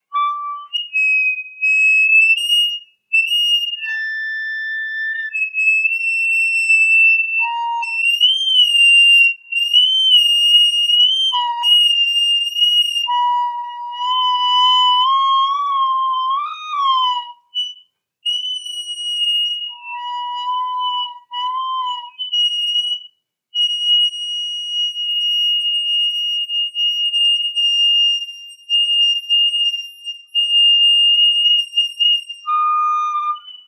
Hearing Aid type feedback generated by a small Radio Shack amp & speaker and a cheap mic.
Recorded for use in the play "House of Blue Leaves" by John Guare.
acoustic-feedback, Feedback, high-pitch, Hearing-aid